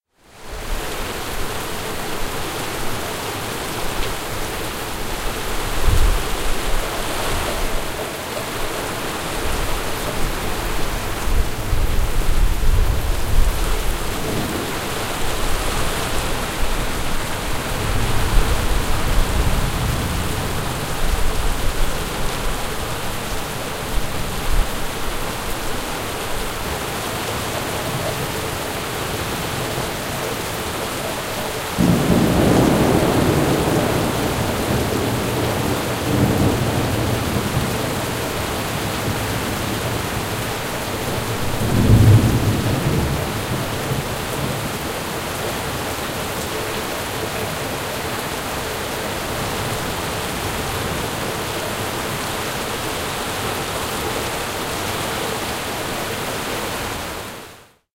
Heavy rain and rolling thunder. Recorded with Zoom H4
h4, lightning, rain, rainstorm, storm, thunder, weather